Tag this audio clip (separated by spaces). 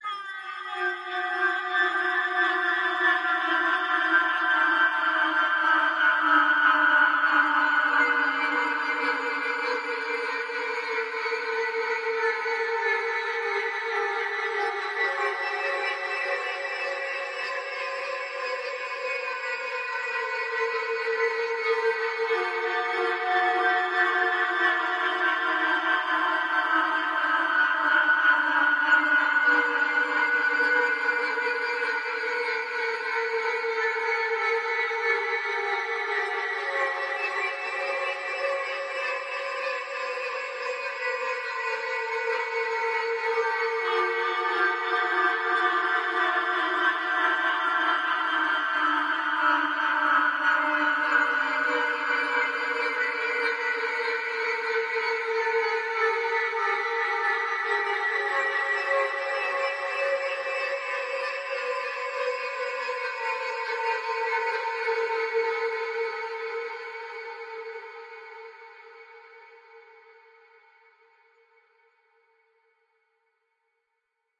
alien ambient creepy crying dark drone Ethereal fear scary space